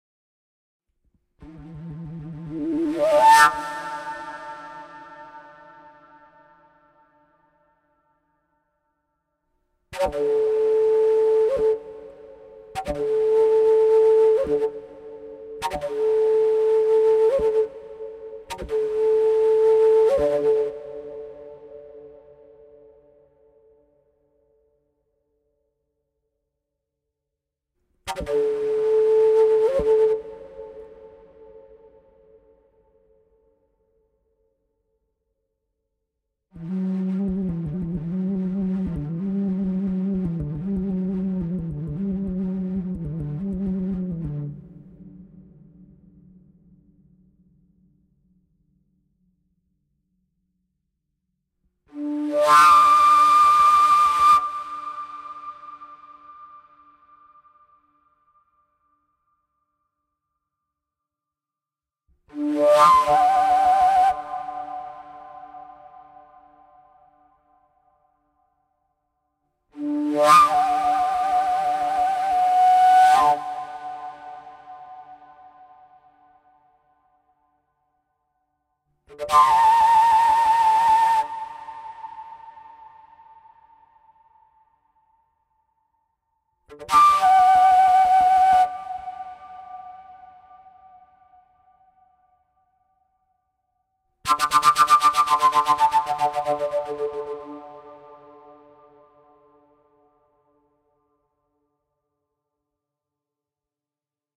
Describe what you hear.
reverberated PVC fujara D samples
Fujaras and other overtone flutes exhibit all their splendour and richness of sound in a nice reverb. So I uploaded some reverberated fujaras. You can download and use raw samples also.
ethnic-instruments, fujara, overtone-flute, overtones, pvc-fujara, sample, woodwind